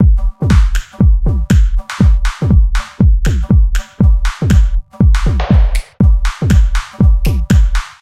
flipside-techno-05
A techno loop with something that sounds like a brushed synthetic snare, claps and finger snaps and funky kicks added.
techno, spaced, loop